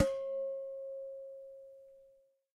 Percasserole rez B 1
household, percussion